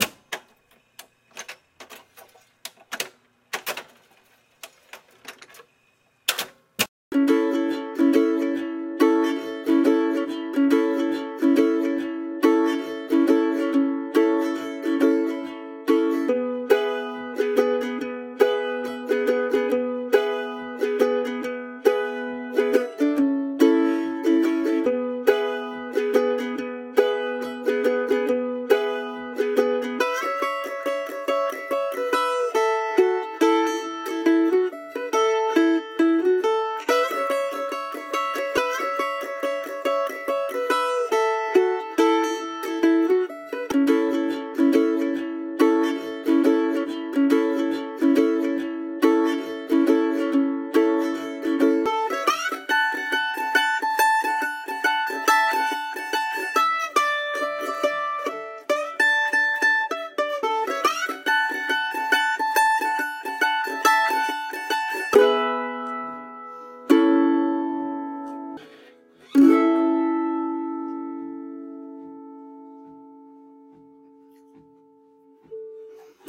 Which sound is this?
Sketch of a Seafaring Influenced Mandolin. I'll help promote if you send a link.
cape, chanty, coastal, cod, folk, mandolin, sea, shanty, sketch, whaling